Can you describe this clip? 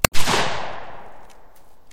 Mossberg 500A - 1 shot
Firing a Mossberg 500A in a woodland environment, 7 1/2 load.
Recored stereo with a TASCAM DR-07 MkII.
Here's a video.